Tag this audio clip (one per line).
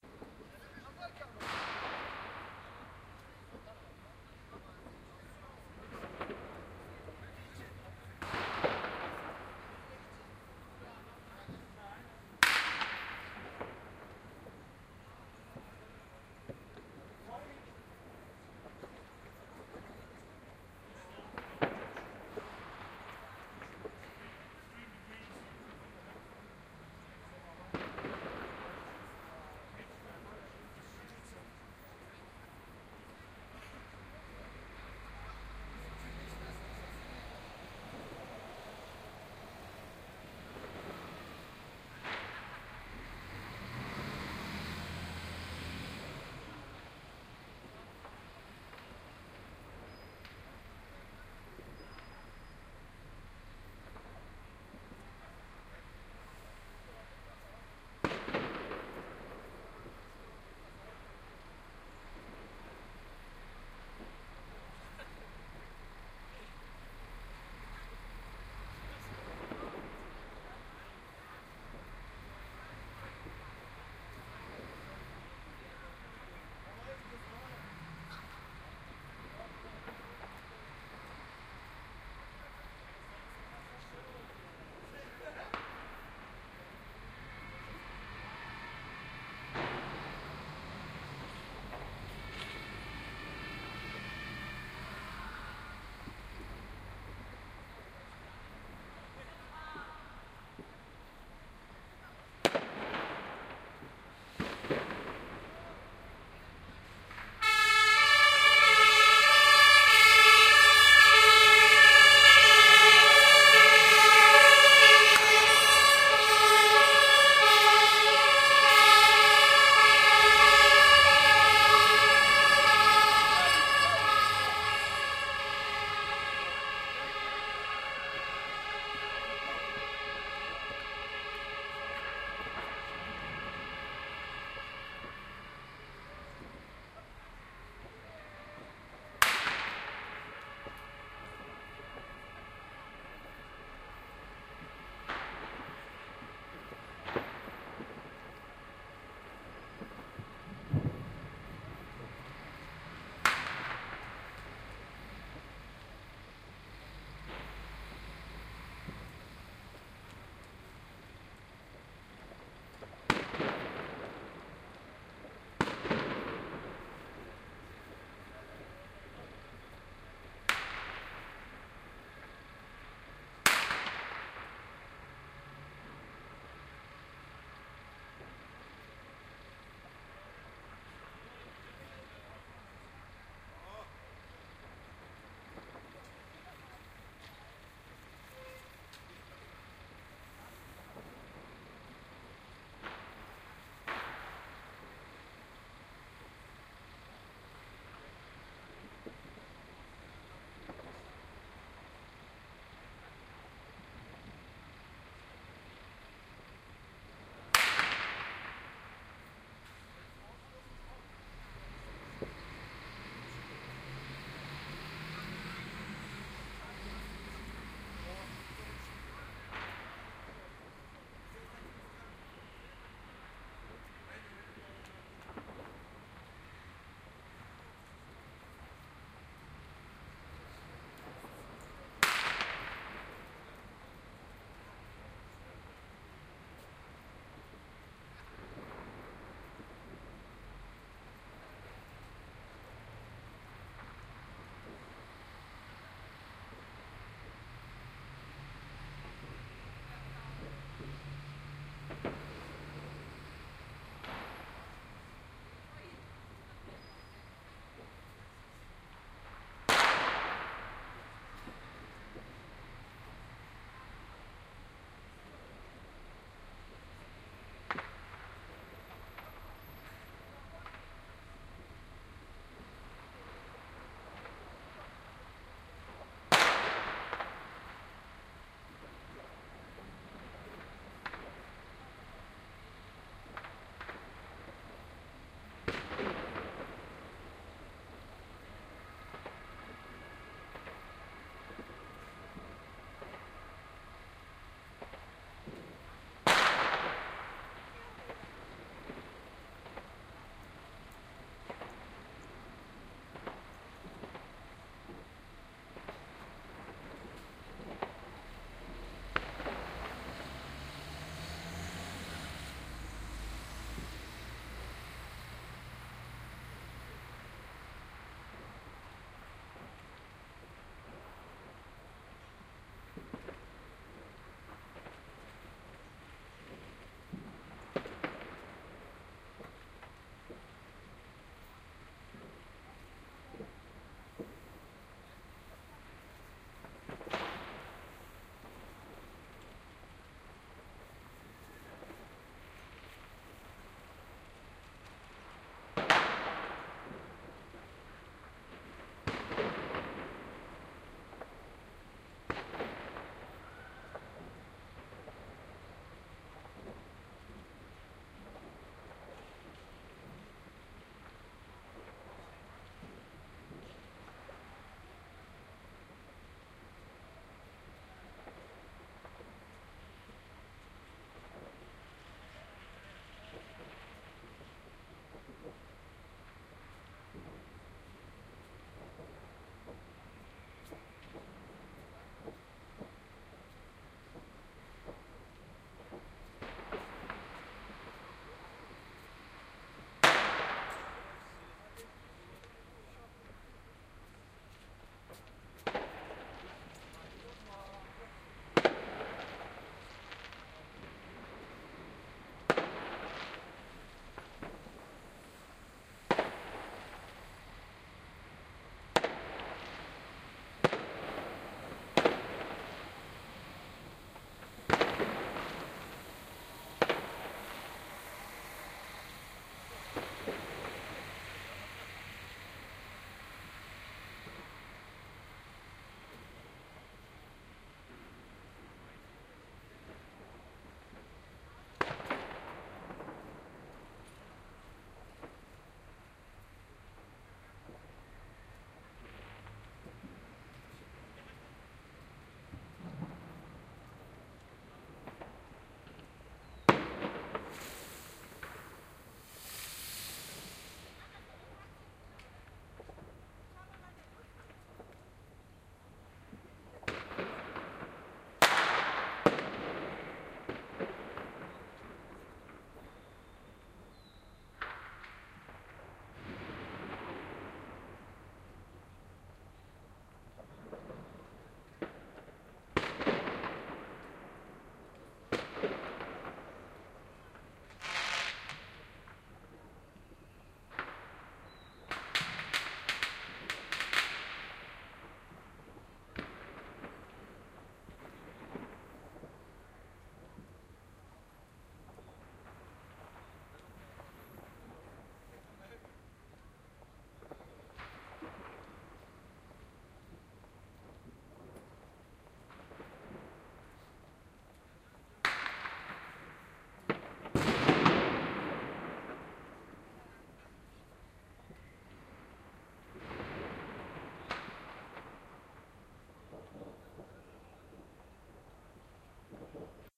Feuerwerk
Silvester